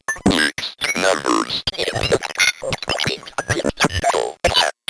MIXED NUMBERS. one of a series of samples of a circuit bent Speak N Spell.